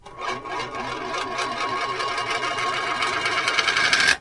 A thin metal disc of about 8cm radius spinning to rest on a wooden floor.